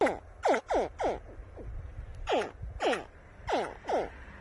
Tiny Croc Chirp
Small crocodile chirping.
Noise removal in Audacity helps with the background noise, but I didn't apply it to this recording.
If you want to say thanks, upload an edited version of this sound (noise removal, alien-mastering, whatever you ended up with).
voice, reptile, crocodile, animal, chirp, exotic, croc, creepy, alien, adorable, alligator, aliens, scream, weird, cute